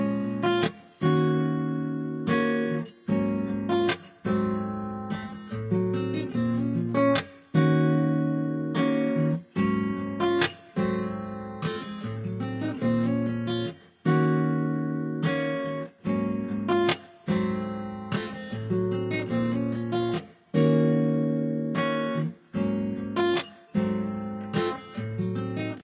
Acoustic Guitar 74bpm Bbm
smooth, trap, hiphop, chill, lofi, lo-fi, acoustic, hip-hop, guitar, loop, cool